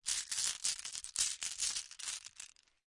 Glass marbles being shuffled around in cupped hands. Dry, brittle, snappy, glassy sound. Close miked with Rode NT-5s in X-Y configuration. Trimmed, DC removed, and normalized to -6 dB.
hand, marble